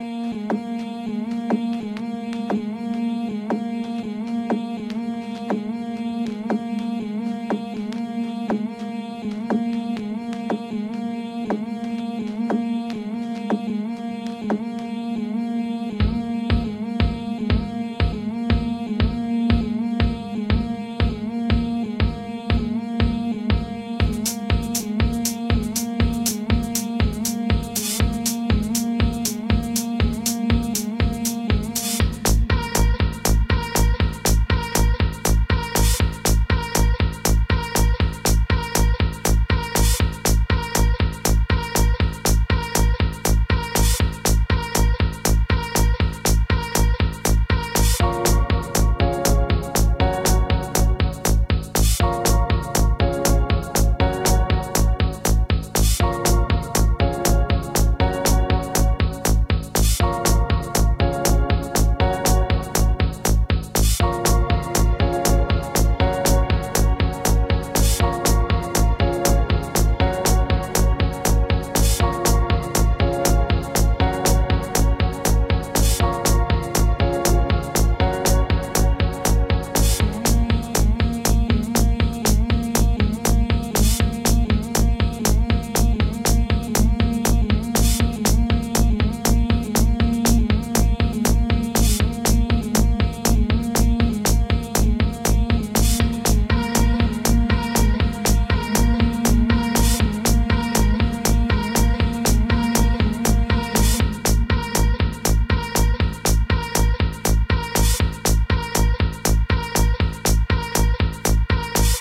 Electrotrex-x4 - electro music loop.
synths: Ableton live,komtakt,Silenth1,Reason